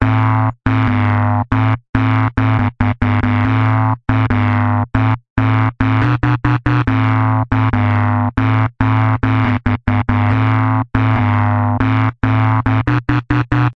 bass 140bpm a
loop, sample, riff, bass, synth, house